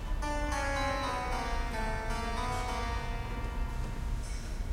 tuning clavichord
fragment of someone tuning a harpsichord in a church while playing some notes on it.